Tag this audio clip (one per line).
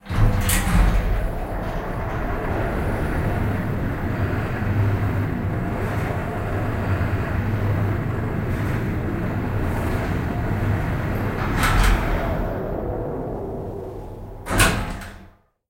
Movement
Mechanical
Stereo
Fan
Elevator